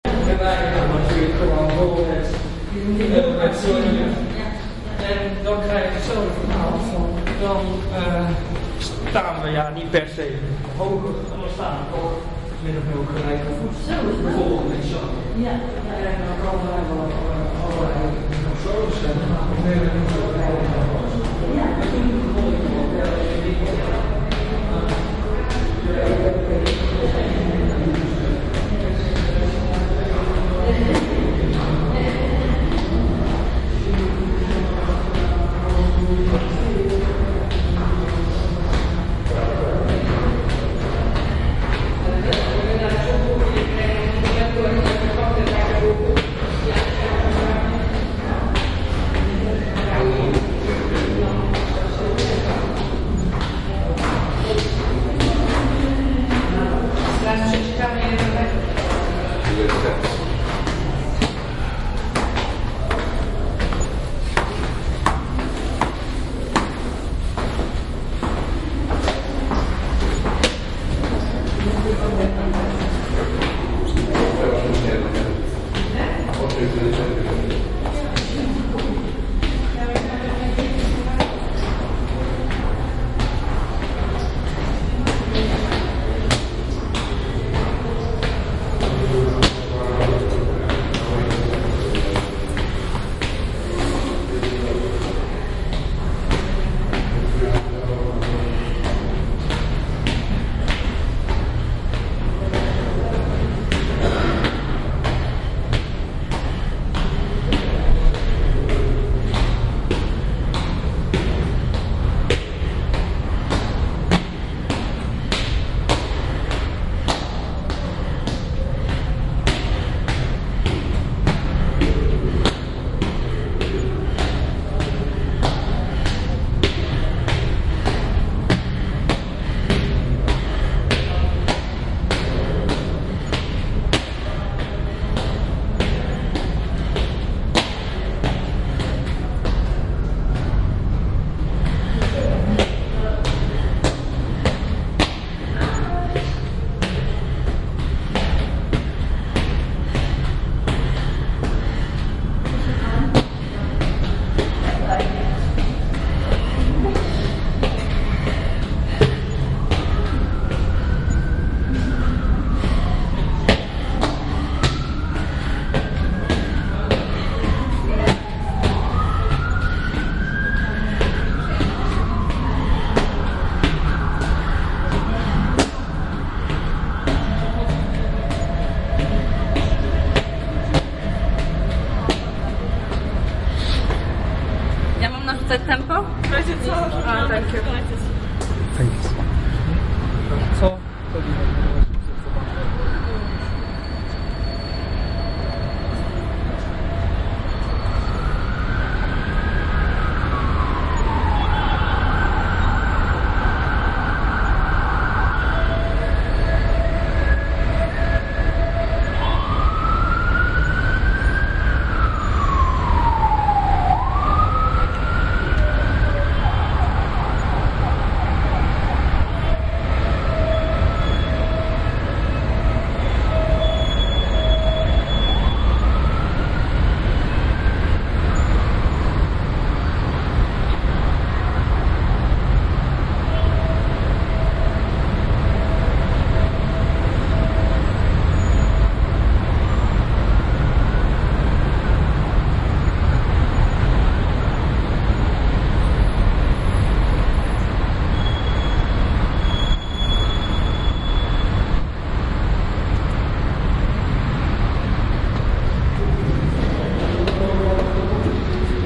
Monument - Climbing to the top of Monument and sounds from top
ambiance, ambience, ambient, atmosphere, background-sound, city, field-recording, general-noise, london, soundscape